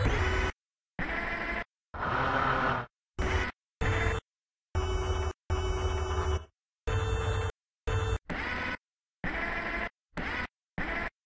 Multiple sounds of robot arms moving up and down.
Recorded with Zoom H5 with XY capsule. Samsung scanner + ryobi drill. Pitch shifted to add character.
servo-motor, servo, robotic, robot-movement, Robot-arm